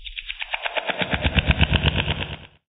An interesting processed noise.
artificial, bizarre, echo, effect, electric, electronic, freesampler, futuristic, fx, machine, noise, processed, random-sound, rhythm, rhythmic, sample, sci-fi, sound, sound-effect